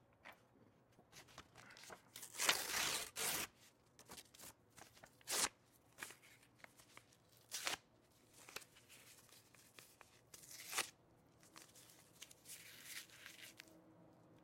Paper being ripped
paper rip into little peices